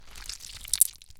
rubber anti stress ball being squished
recorded with Rode NT1a and Sound Devices MixPre6